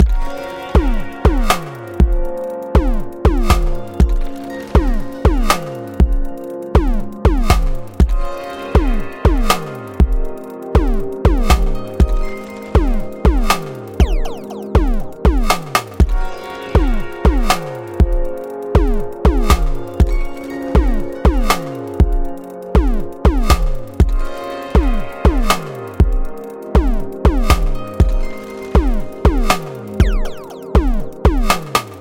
Loop with a pleasant melody and drums. cheers!
120-bpm, atmospheric, chillout, click, delay, drum, drumloop, glitch, loop, organic, pad, synthesizer